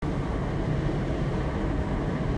wind windy storm